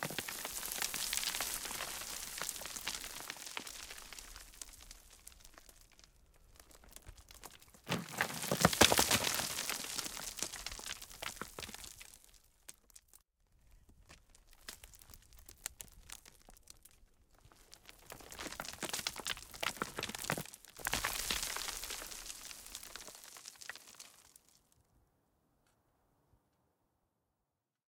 Some pebbles rolling down in a quarry.
Sennheiser MKH 418.